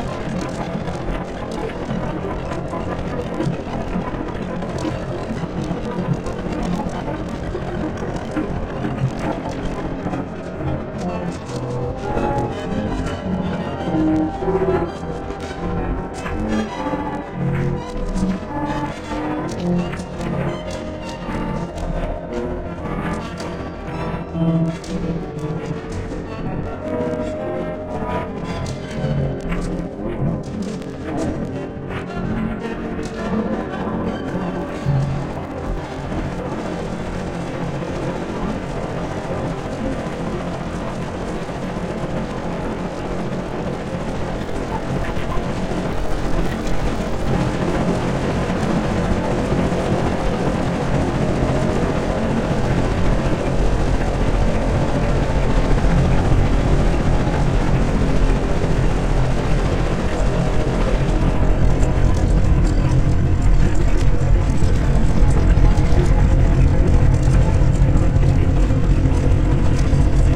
AlienTalk Akaized 150%
150, akaized, alien, crazy, factor, fat, granular, mars, neptune, noise, outter, random, space, synthesis, talk, time, white